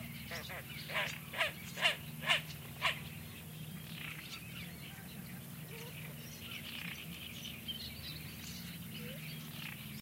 single bird cry. Shure WL183, Fel preamp, PCM M10 recorder. Recorded at the Donana marshes, S Spain